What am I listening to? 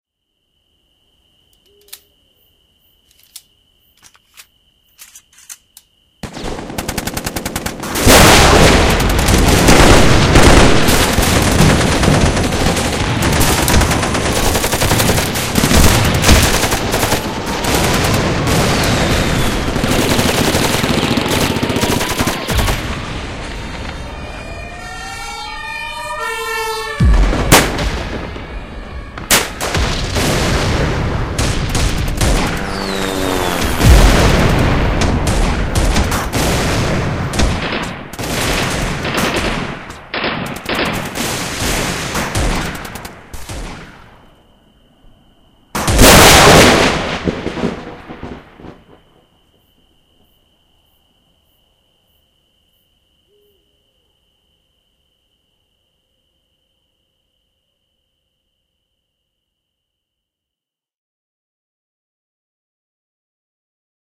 warning! loud! I am not held responsible for any speaker damage that you may face. This sound is a bit longer, and has no scream in it! But it does have a few sirens, and some crickets as background. Check it out at your own risk!